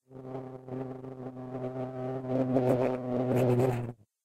Bumble bee short
A short recording of a bumble bee.